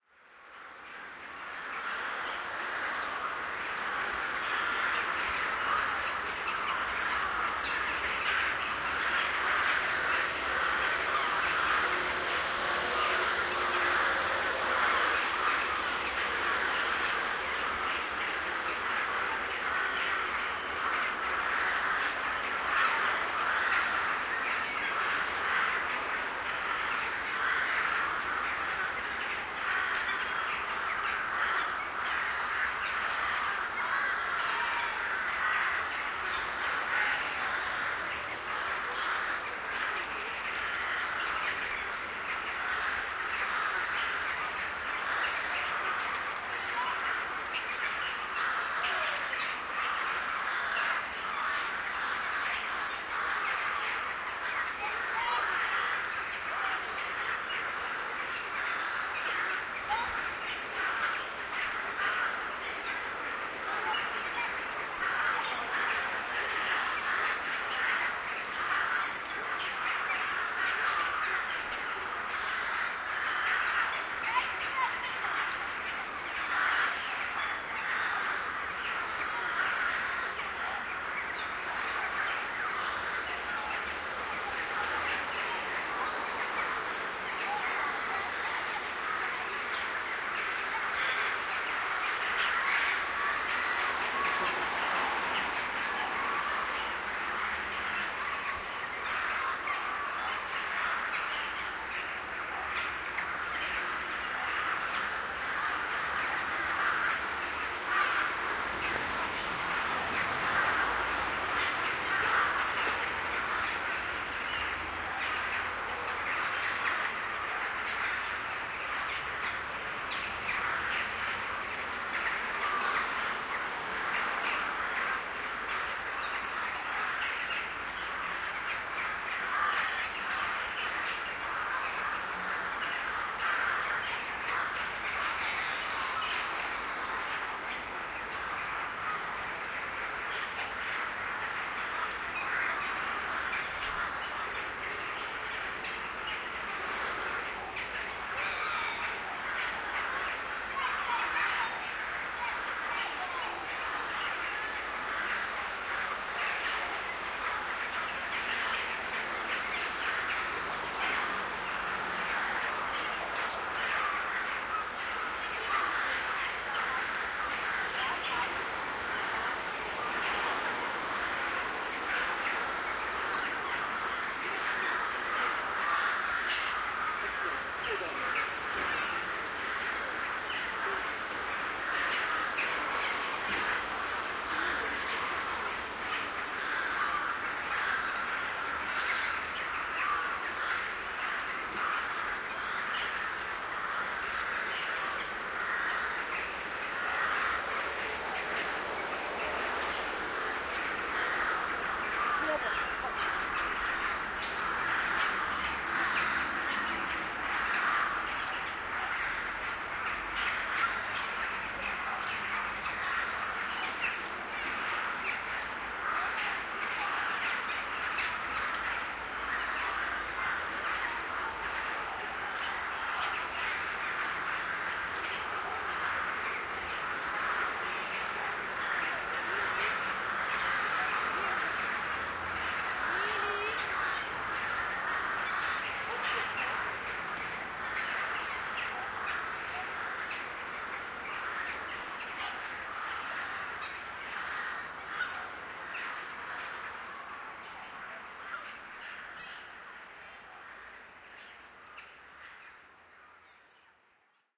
ptaki w parku im. S. Staszica w Łodzi 21.09.2018
21.09.2018: birds in Moniuszko Park in Łódź. recorder zoom h1
field-recording, Poland, city, ambience, birds, street